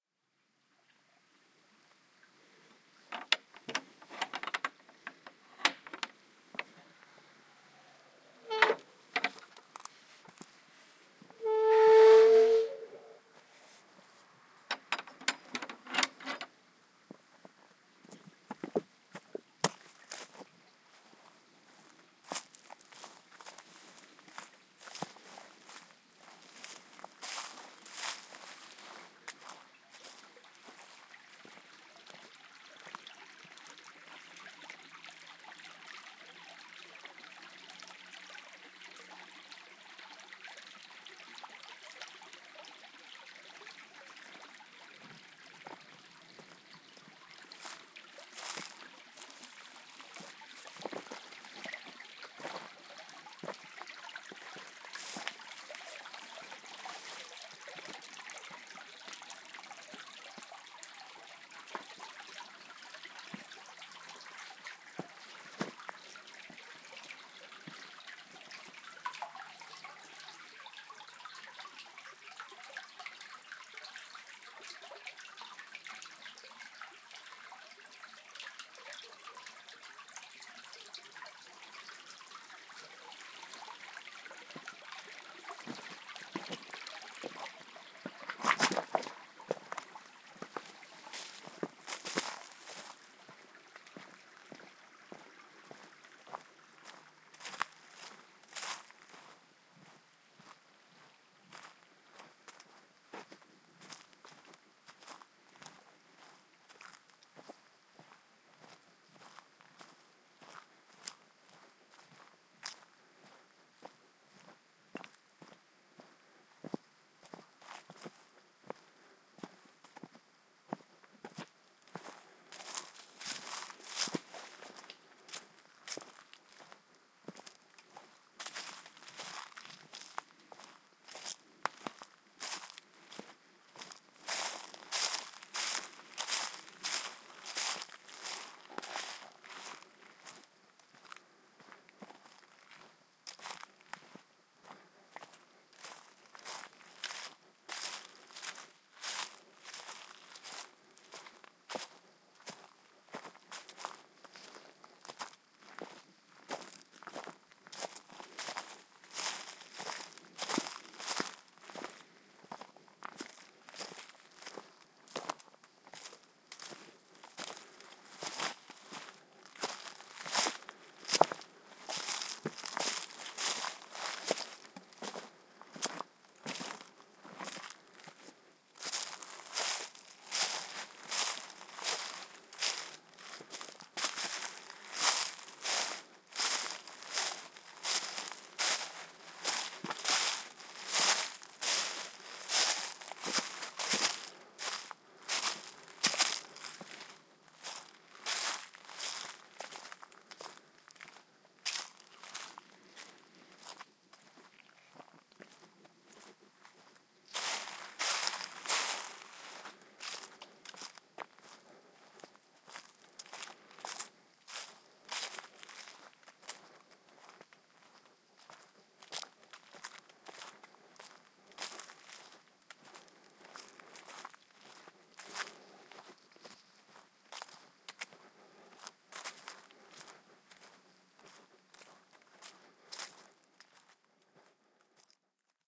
Woodland Walk
A binaural field-recording of walking through a woodland.The recording begins at a creaky gate followed by a visit under a bridge to check if birds are nesting there, then the walk through the woods over varying terrain , leaves stony track and grassland. DIY Panasonic WM-61A binaural mics > FEL BMA1 >Zoom H2 line-in.
creak, footsteps, water, stream